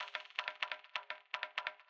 bongo loop
loop i have sequenced with bongo samples and ping pong delay